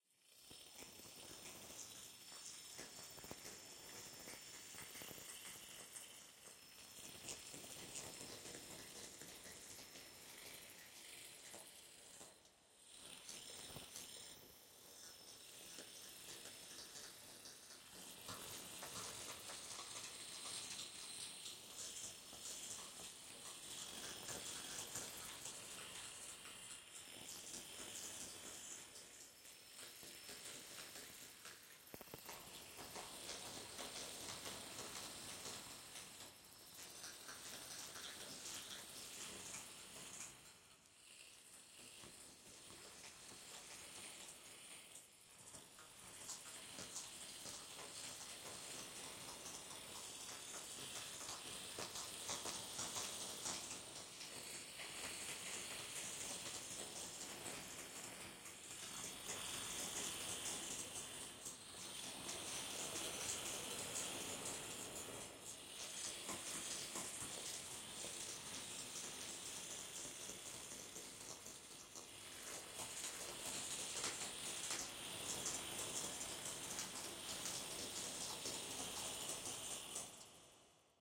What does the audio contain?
07 - frequency shifter (-5.3kHz)
6th step of sound design in Ableton. Added Ableton's frequency shifter.
strange, freaky, sfx, sound-design, sounddesign, weird